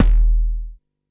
9 sia analog modular kick
Analog Modular Kick drums, created on a eurorack with a jomox modbase 09 running into a slightly overdriven make noise mmg filter, layered with percussion noises from a noise engineering basimilus iteritas, which were then both sent to a warm audio wa76 compressser which is a 1176 clone, most of these sounds utilized the "all in" ratio technique these compressors were known for.
enjoy, credit where possible.